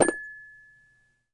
Stereo multisamples of a toy plastic piano recorded with a clip on condenser and an overhead B1 edited in wavosaur.